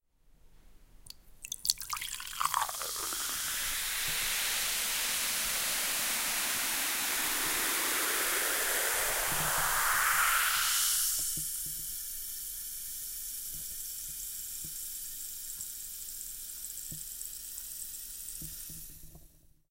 Remplissage d'un verre de cidre "la bolée de Paimpol", dégustation sur l'île d'Ouessant.
Les commentaires sont aussi les bienvenus :-)
Breton cider on the Ouessant Island in France.
Want to support this sound project?
Many many thanks
boisson, bretagne, breton, cider, cidre, drink, field-recording